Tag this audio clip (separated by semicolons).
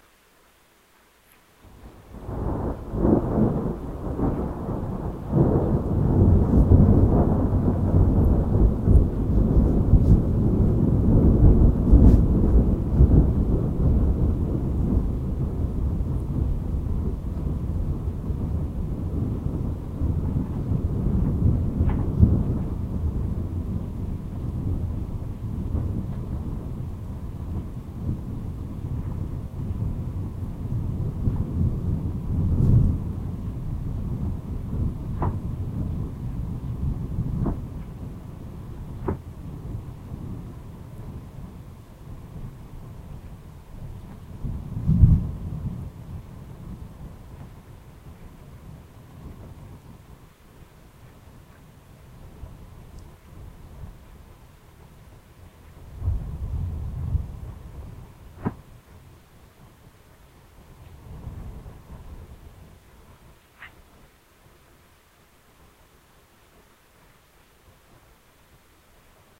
west-coast; north-america; ambient; storm